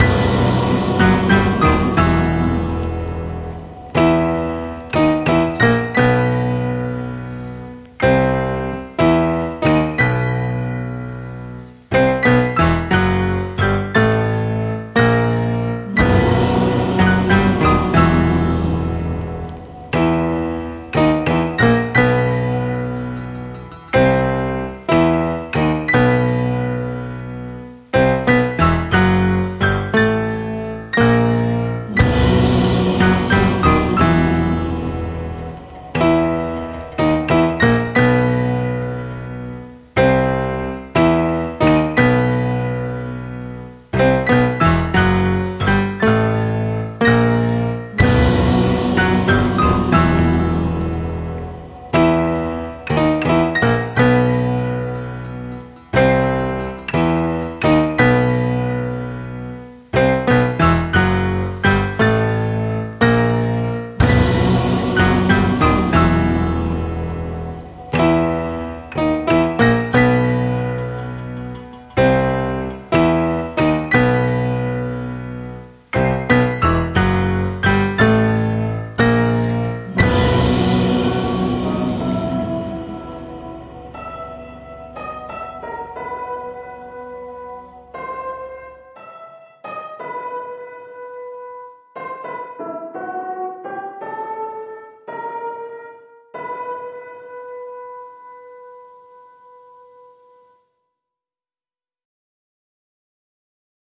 Dramatic piano
A dramatic tune I made on mostly piano in GarageBand for something called Victors Crypt.
I imagine a mad sweaty Beethoven-like composer by the piano in a concert hall. He's pounding those poor keys on the piano.
I added some background-sounds to make it more moody.
Could be perfect for an intro, something dramatic, big and serious. Maybe a enormous creature from the abyss....!!!
Crime, Horror, Piano, Powerful, anxious, big, cinematic, creepy, dark, drama, dramatic, evil, fear, fearful, frightful, ghost, gothic, haunted, hell, monster, nightmare, phantom, scary, sinister, spooky, suspense, terrifying, terror, thrill